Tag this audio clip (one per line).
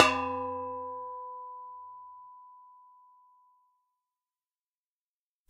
percussive
iron
clang
hit
metallic
tube
metal
work
ting
steel
pipe
percussion
industrial